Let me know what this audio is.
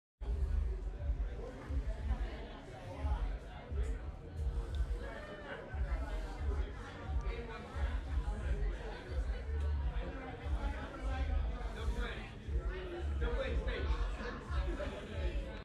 May 5 2022 110843 PM audio behind a bar
Audio behind a bar in Arcata California